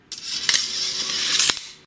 Sword sheathing
combat, blade, shing, draw, knife, weapon, scrape, scabbard, unsheath, sword, sheath